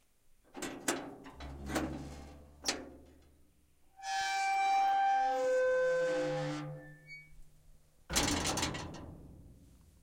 Large metal sheet and ornamental metalwork gate to village cemetery opened and shut, creaking on it's hinges. Recorded around midnight to avoid bird and insect calls - despite this precaution there were birds calling in the distance. On arrival I saw there was a house adjacent to cemetery. Fearful of waking the non-dead I didnt hang around for more than two bad takes. I've added this not so impressive recording to give a taste of what I hope to record of this wonderfully vocal gate in the future.